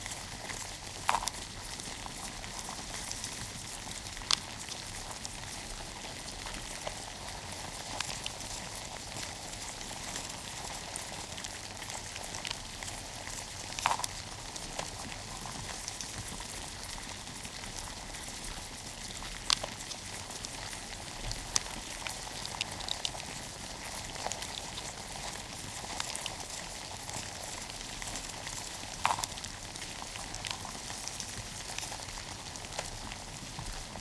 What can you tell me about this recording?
BS Swarm of roaches
A disgusting sound of a real box of cockroaches crawling next to a microphone. Enjoy!
disgusting, crawling, insects, Swarm, cockroaches